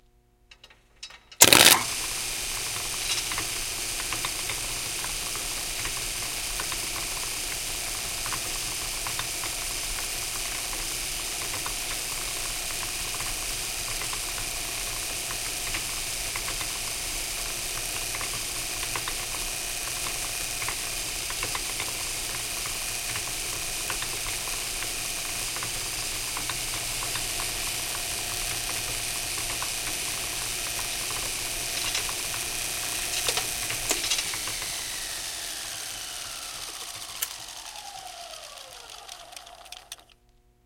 machine metal cutter grinder rollers switch on off spark
cutter, machine, grinder, metal